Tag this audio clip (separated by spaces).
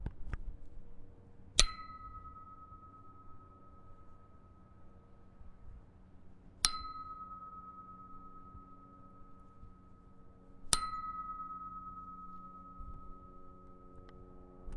metal percussion pittsburgh ring signpost strike